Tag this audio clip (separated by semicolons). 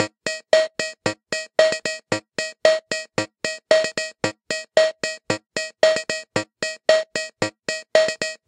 toy; percussion; Yamaha; PSS130; loop; electronic; rhythm